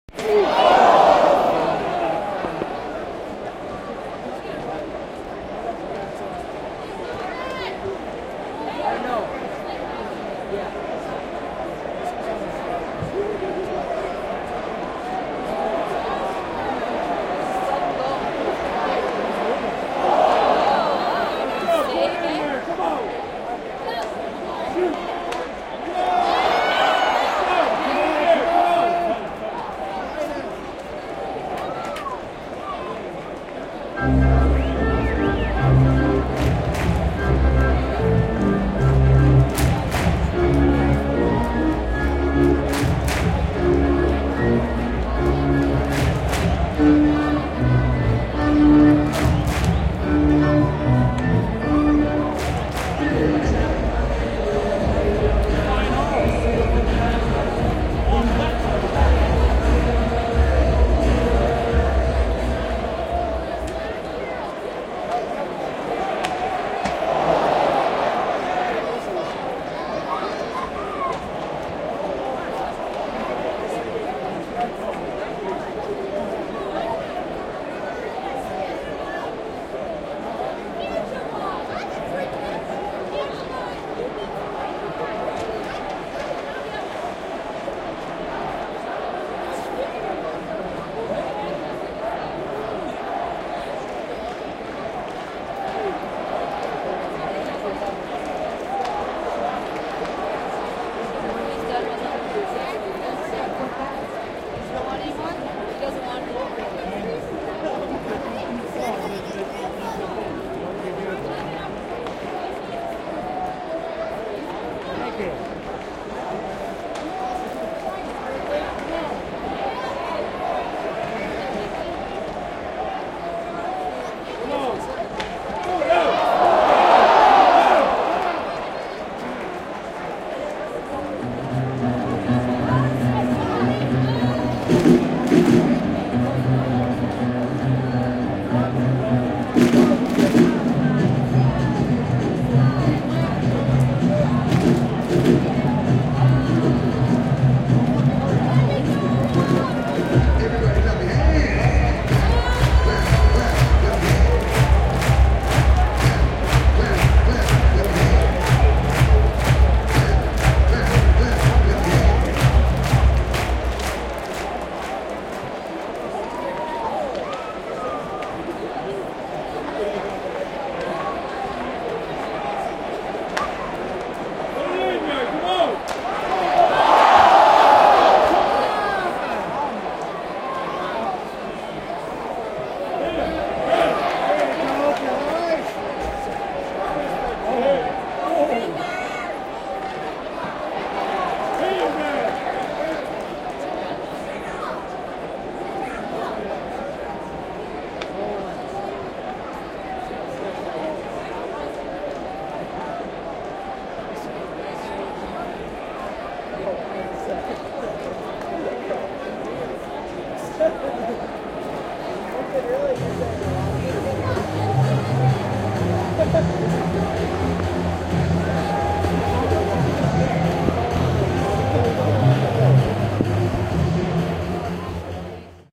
Cheers, lots of "ohhhs!" when a goal is missed, some intermission music and general crowd sounds